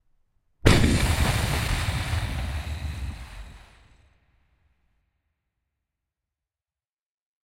A collection of pitched and stretched vocal takes to replicate the sound of an explosion.